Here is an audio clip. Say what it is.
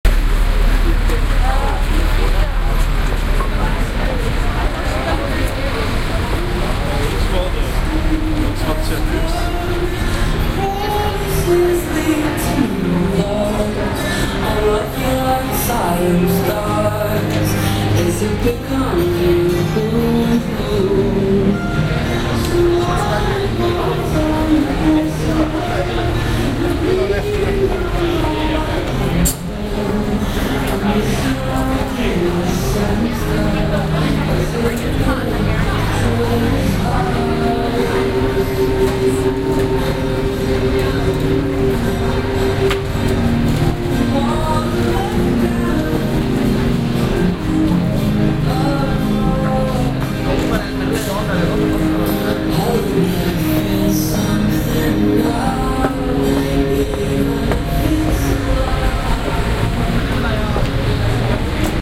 Oxford Circus - Topshop crowds